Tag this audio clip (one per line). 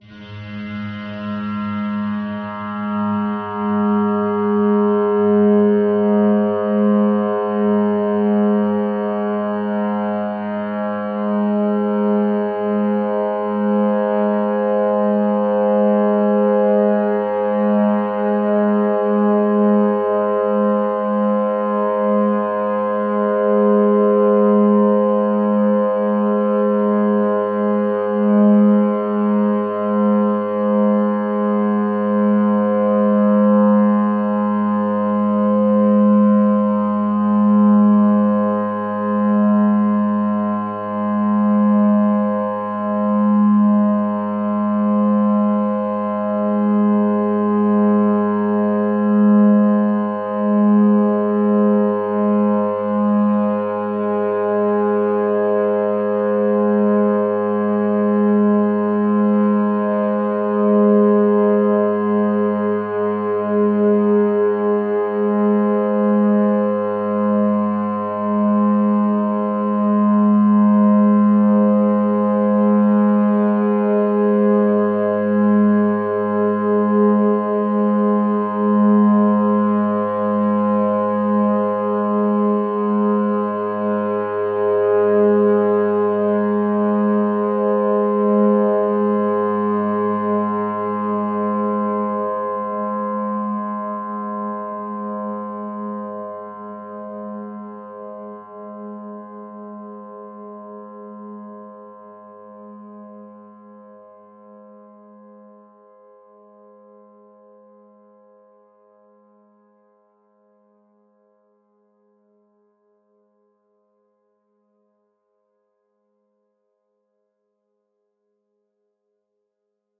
ambient
overtones
pad
multisample